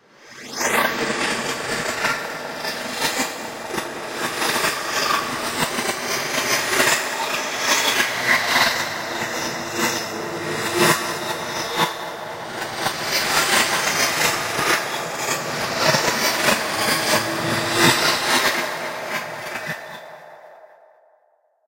I was testing some VSTis and VSTs and recorded these samples. Some Audition magic added.
More and more reverberation and delay both forward and backward.
echoes, horror, noise, reverberation